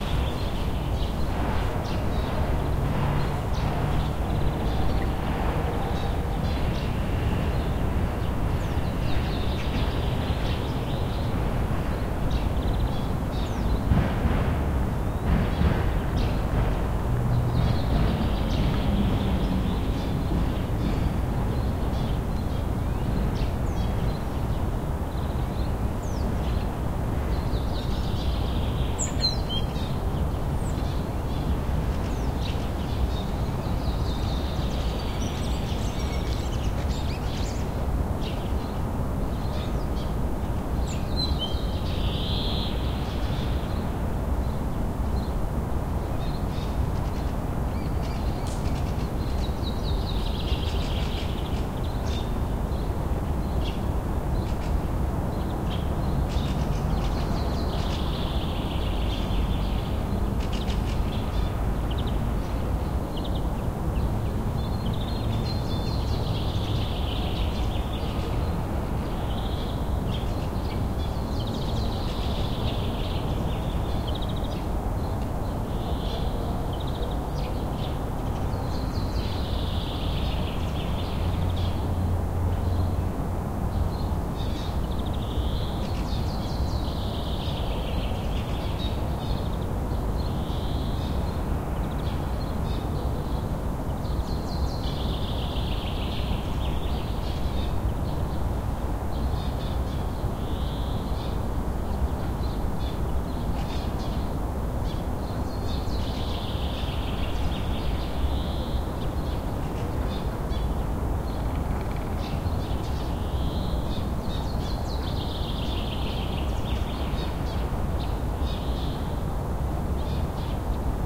Birdsong early morning. Spring. Hum of city.
Recorded 20-04-2013.
XY-stereo, Tascam DR-40. deadcat